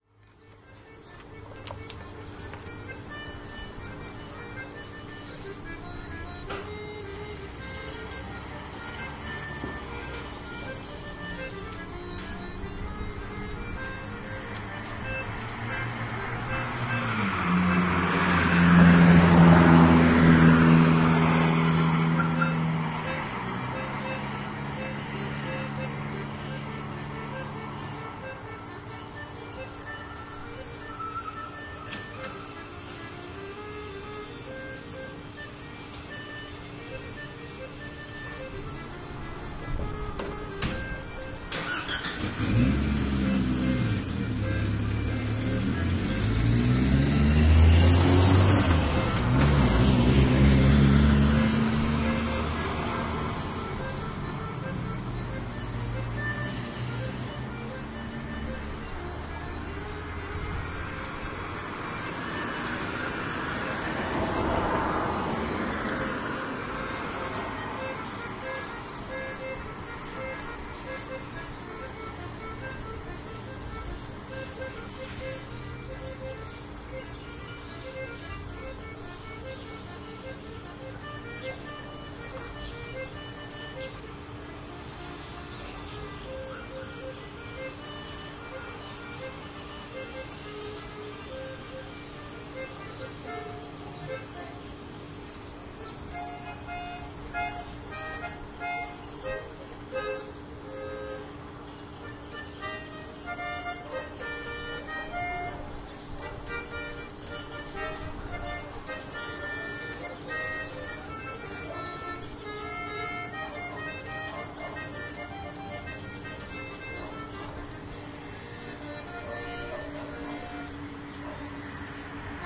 20101205.08.accordion.n.traffic
an accordion plays, one car starts and leaves, others pass by. Recorded at downtown La Paz, Baja California, Mexico. Shure Wl183 mics into Fel preamp and Olympus LS10 recorder.
traffic, accordion